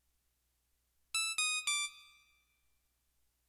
Sonido mirada demonio
doly in a la mirada del demonio efecto de sonido